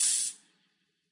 This comes from a drum synth function on an old mysterious electric organ. It also features the analog reverb enabled.